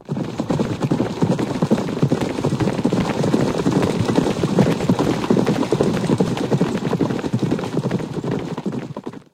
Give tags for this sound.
galopp
hooves
horse
horses

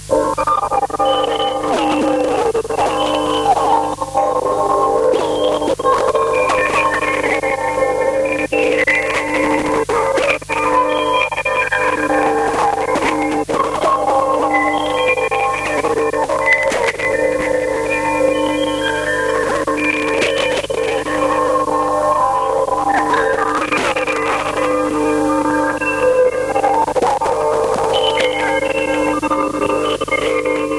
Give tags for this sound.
cassette-tape
VHS

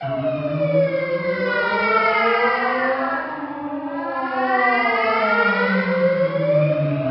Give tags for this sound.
voice
processed
female

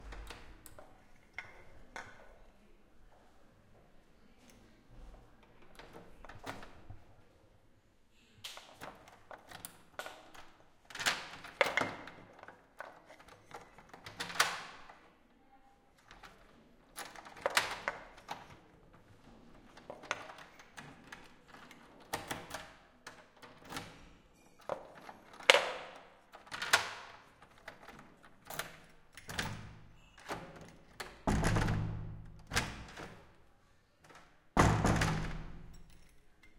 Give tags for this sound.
large
door
open
close
key
antique
handle
wood

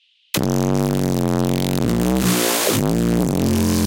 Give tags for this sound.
amon
bass
distorted
dnb
drum
drumnbass
dubstep
funny
growl
grunt
heavy
industrial
noisia
processed
synth
tobin

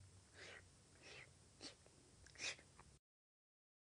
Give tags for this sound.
Lamer
perro
piel

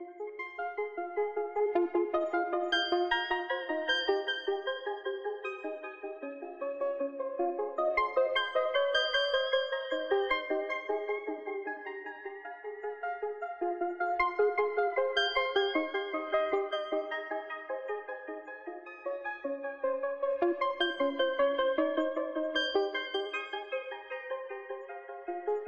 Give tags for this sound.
ambient
Arpeggio

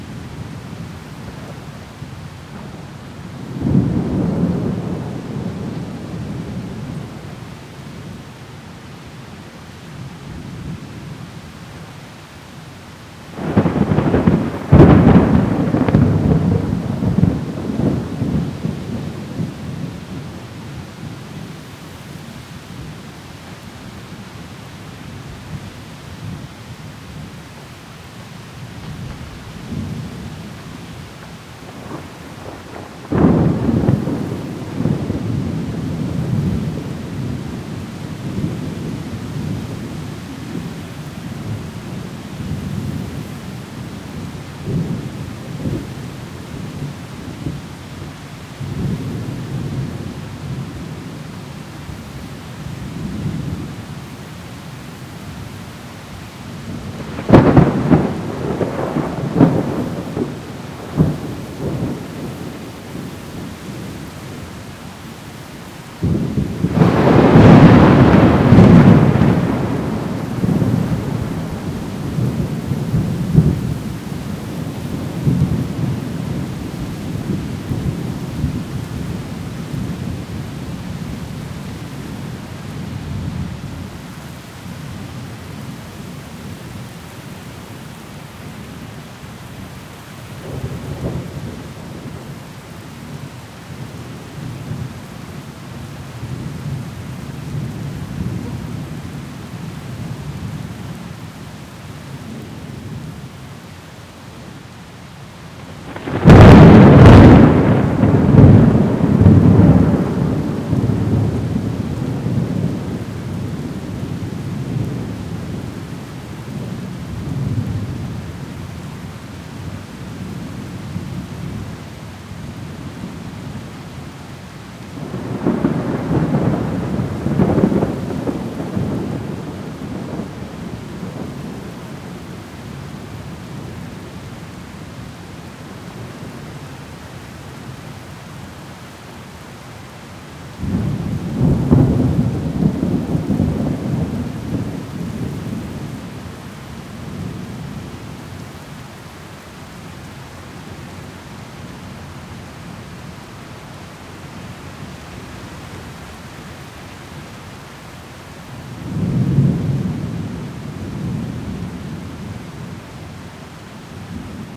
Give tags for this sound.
thunder-storm
rain

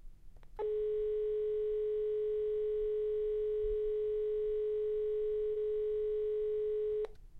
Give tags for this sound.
tone
telephone
phone
Dial
ambience
alert
ring
foley

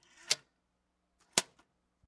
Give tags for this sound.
click
dial

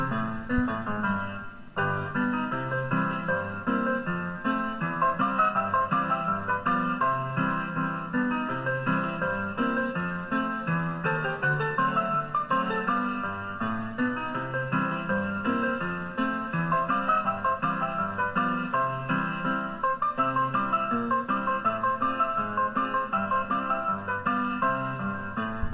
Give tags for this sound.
mic
recording

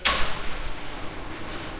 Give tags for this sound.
switch
chain
noise
door
electric
garage
ambient
machinery

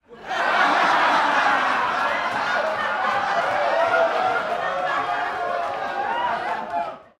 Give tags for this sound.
funny
group
laugh
laughing
happy
haha

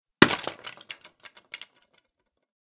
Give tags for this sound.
break
clay
debris
drop
dropped
hit
jar
pot
shatter
smash
vase

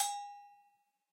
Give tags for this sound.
percussion
hit
latin
bells
cha-cha
samba

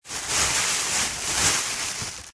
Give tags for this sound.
garbage
plastic

